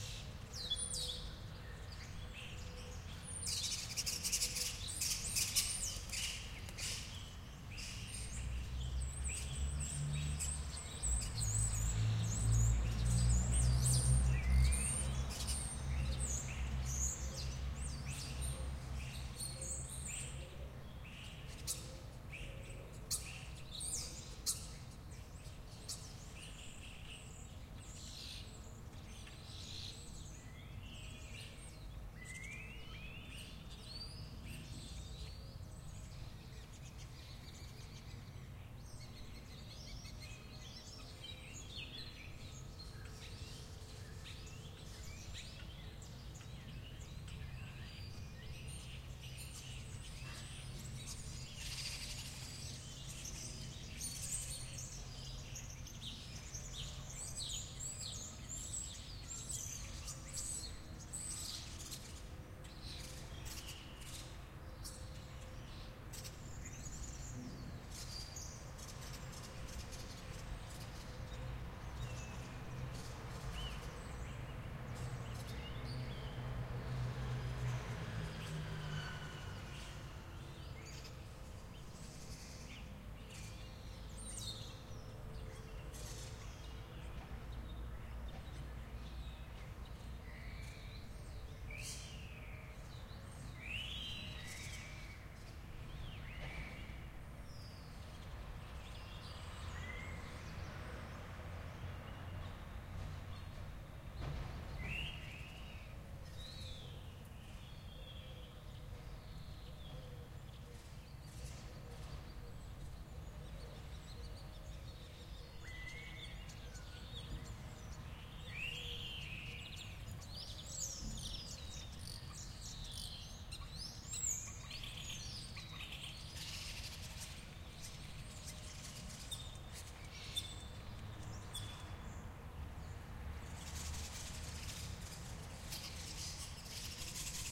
bologna countryside birds
birds in country side italy, bologna
birds, soundscape